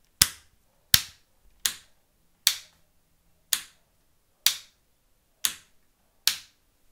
Wall mounted light switch recorded with a Samson C15 into Protools.
domestic, light, switch